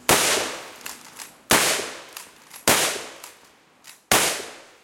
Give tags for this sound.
Shotgun; Gun; gauge; Weapon; Firearm; 12; Shooting

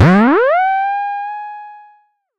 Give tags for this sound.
Synare; analog; drum-synth; percussion; vintage

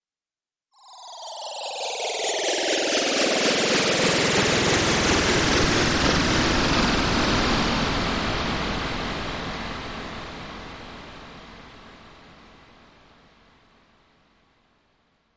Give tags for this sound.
impulsion; effect; ambient; noise; rumble; machine; soundscape; Room; deep; energy; starship; ambience; bridge; pad; hover; futuristic; drone; space; sound-design; spaceship; background; atmosphere; future; drive; sci-fi; electronic; emergency; dark; fx; engine

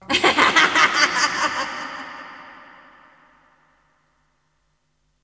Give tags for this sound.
cackle; woman

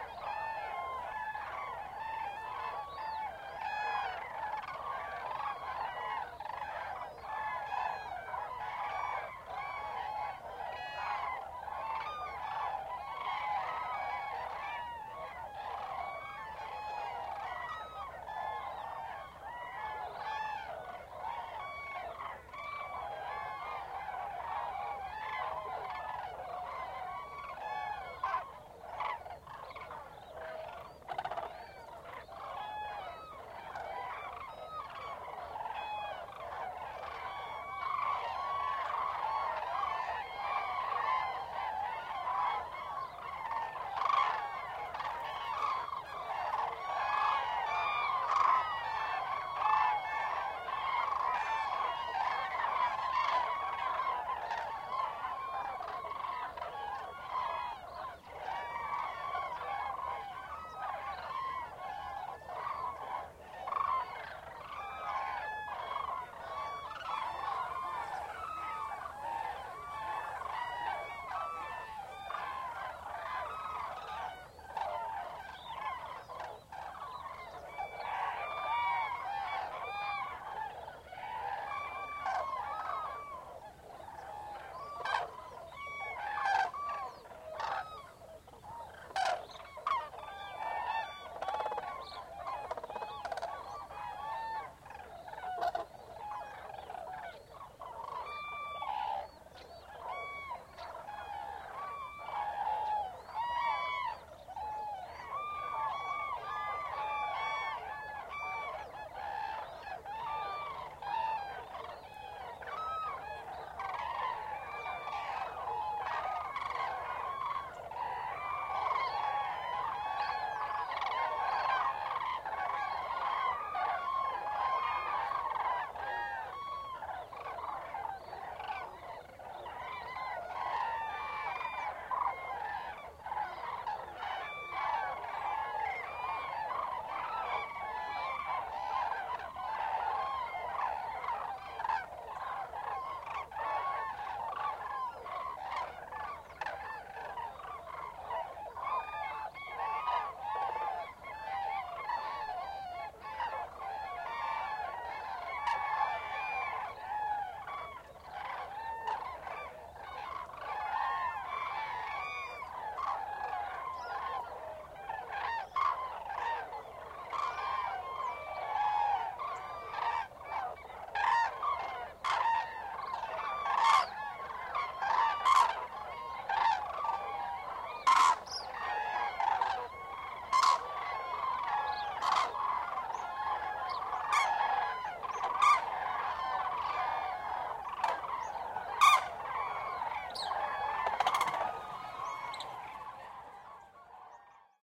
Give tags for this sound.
crane; daimiel; dawn; field-recording; nature

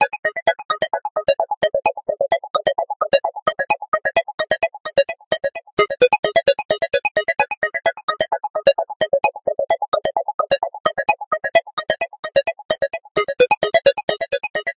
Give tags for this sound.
130; 130-bmp; 130bpm; arp; beat; Beep; bleep; blip; bounce; bouncing; bpm; delay; delayed; echo; echoing; effect; effected; effects; lead; loop; noise; process; processed; sample; signal; sine; sound; tone

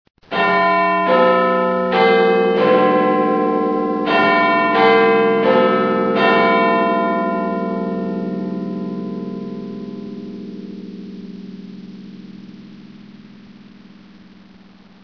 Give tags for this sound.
ben; big; half

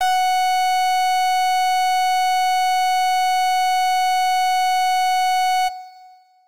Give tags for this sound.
synthesizer; brass; synth; fm-synth

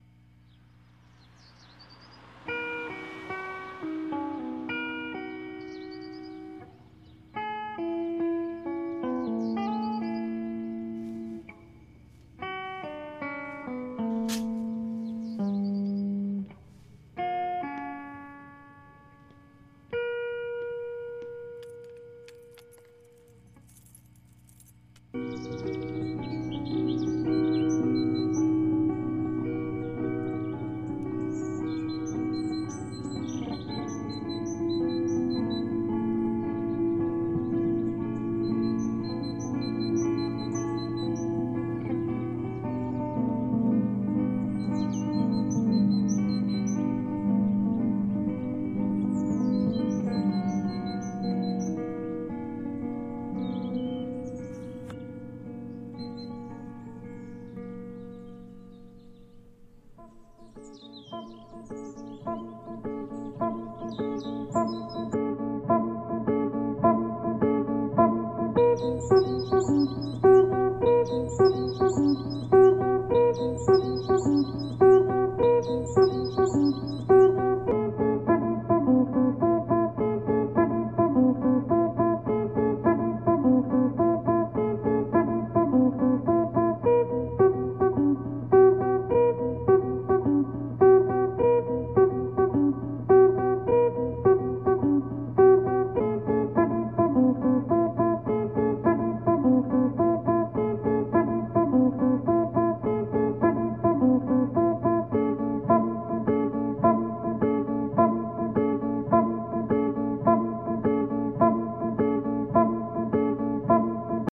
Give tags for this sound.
Acoustic; Acoustic-Guitar; Beat; Guitar; Intro; Light; Mellow; Relaxing; Reverb; Tapping; Trip-Hop

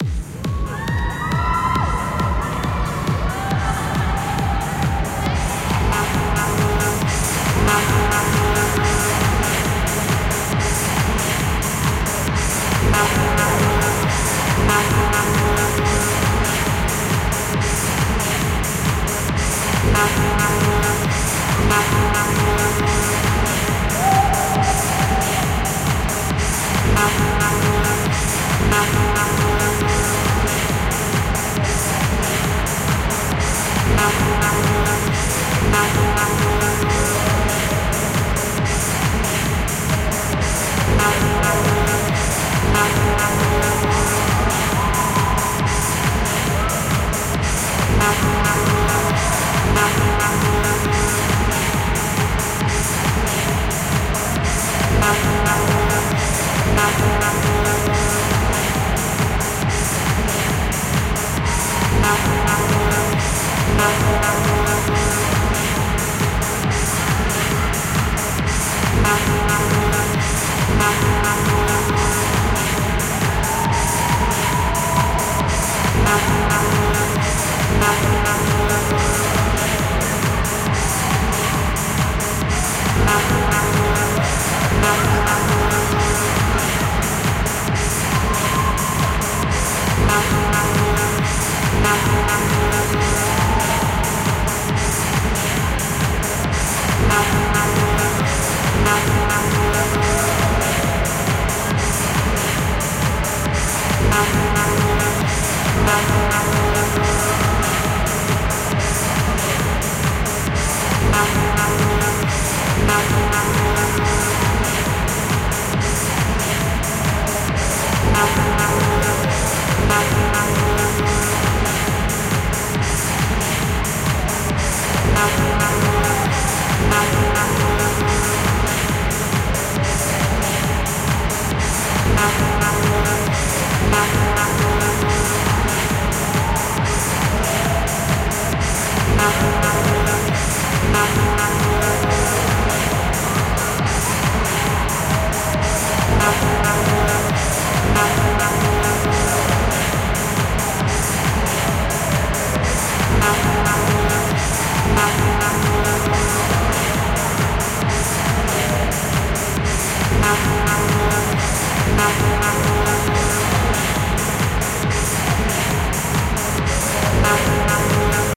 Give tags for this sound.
crowd; dance-music; night-clubbing; out-clubbing; people; talking; voices

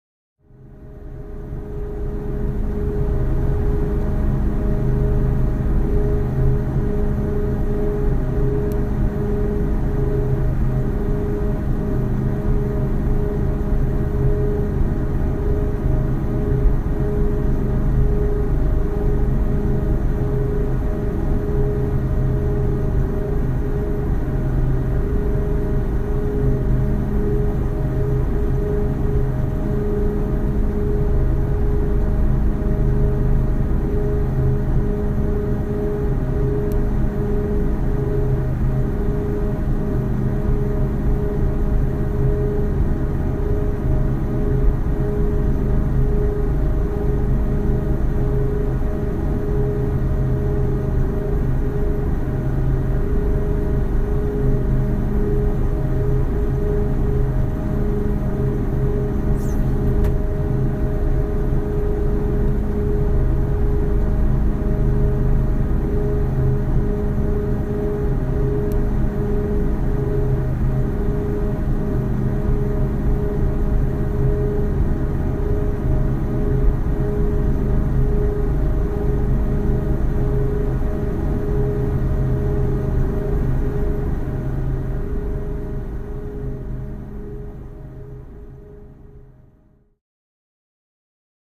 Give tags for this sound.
ambience; atmosphere; background; buzz; compressor; door; drone; electricty; fridge; hum; noise; refrigerator; room; tone; white

